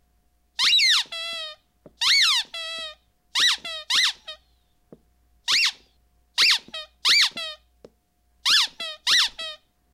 Squeeky Toy
Sound of dog chew/squeaky toy being squeaked